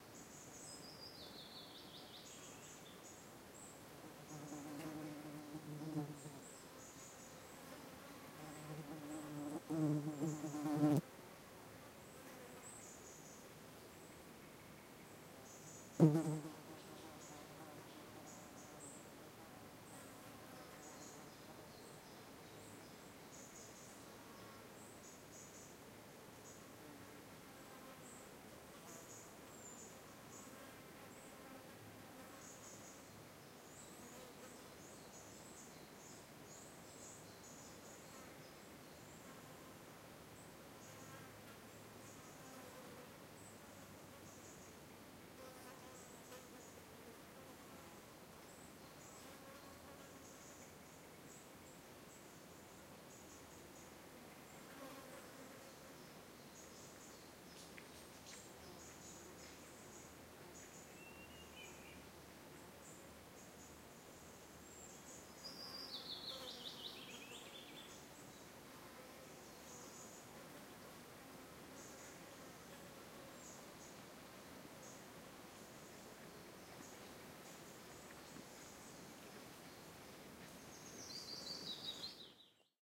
Rural - Insects and birds
Insects and birds in the countryside. Fly lands on microphone at one point!- Recorded with my Zoom H2 -